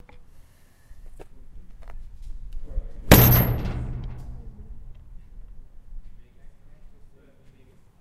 hand hitting skip-bin. recorded from front to different reverb to side.